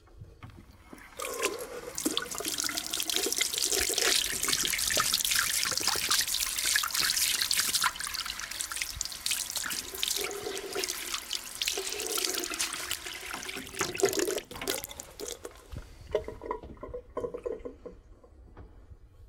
Person cleaning his hands in a bathroom.
hands, water